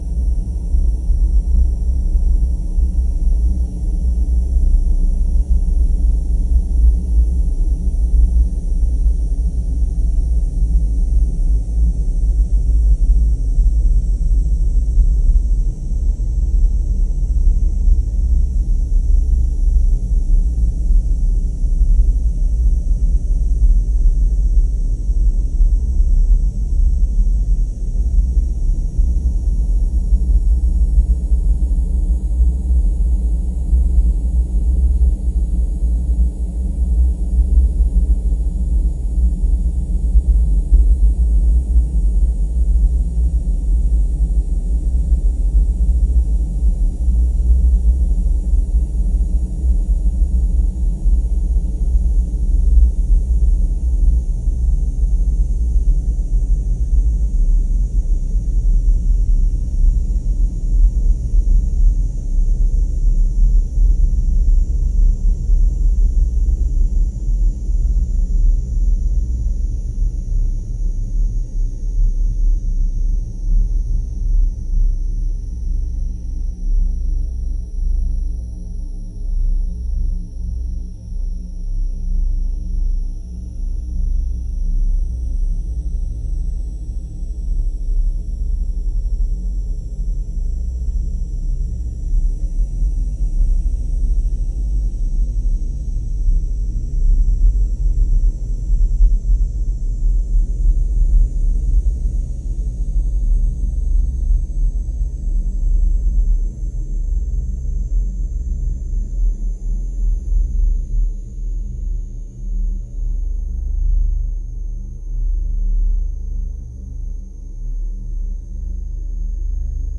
IMG 4342 1kl
the sample is created out of an image from a place in vienna